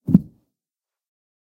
A thump. This was made by me recording myself falling on the floor.